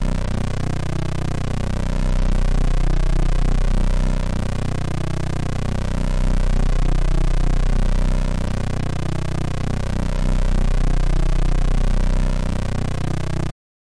Cool Square B0
B0 (Created in AudioSauna)
analog, synth, square, synthesizer